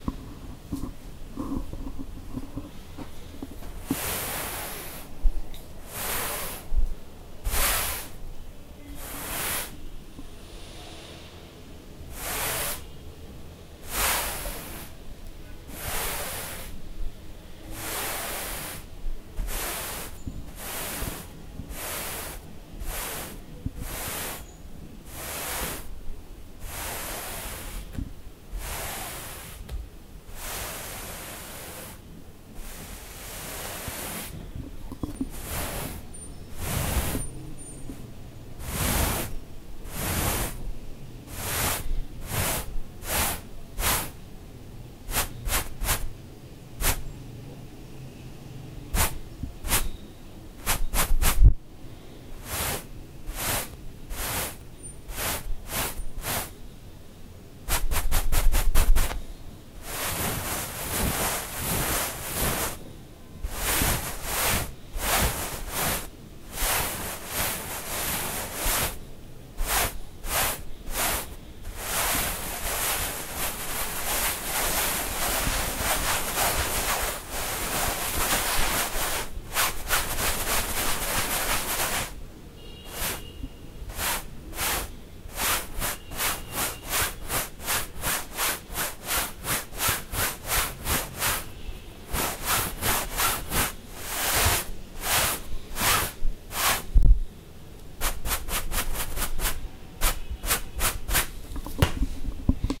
Paint brush
All type of brushes on canvas, fast, slow , long circular brushes, anything needed in post. recorded on zoom h5
sponge, canvas, Pain, Paintbrush, Brush